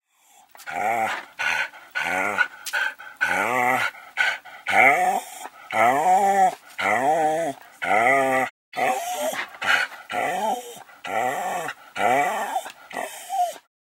Medium Sized Dog Begging after literally barking himself hoarse!
Recorded from Zoom H6 of Pet Dog May 2015 Stereo Mics Slightly Edited n Sony Vegas Software.
Created in small brick room 4x8.